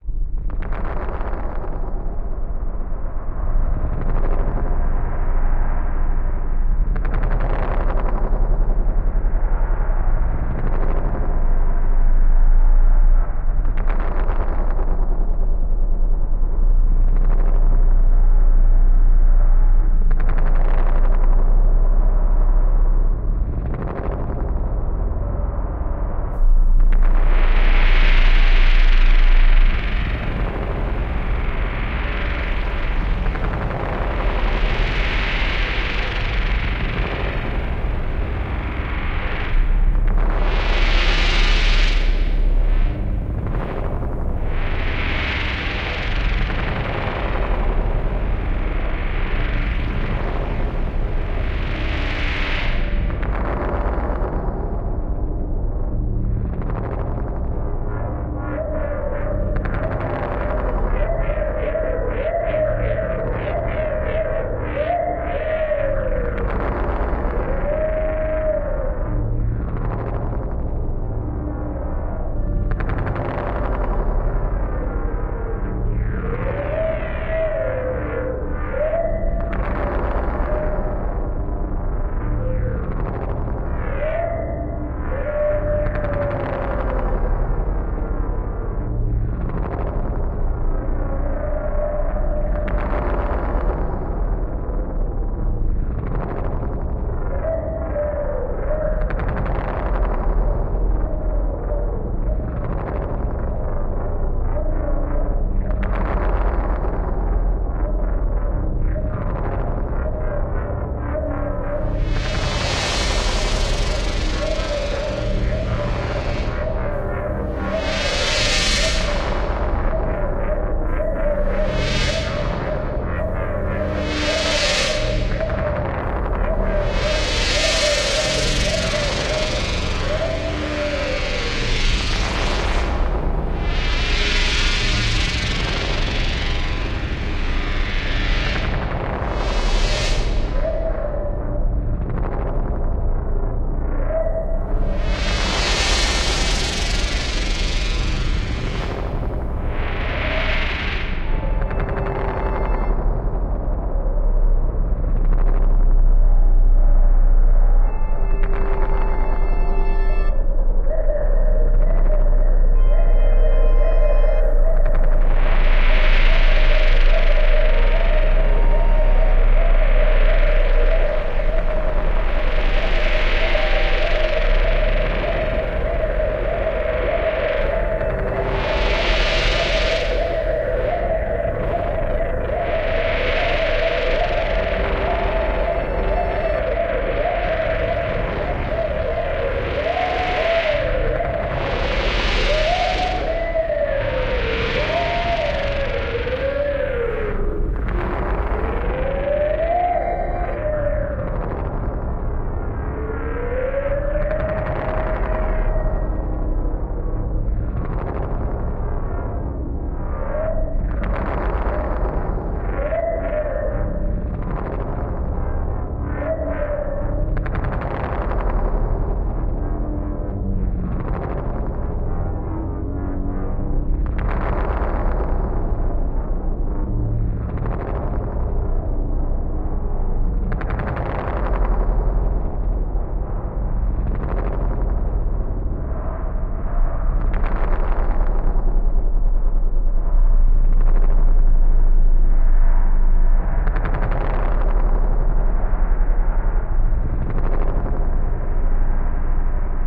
A Dark lil loop i made for my friends, so They don't have to feel alone at night ;p
ambient, Dark, loop, creepy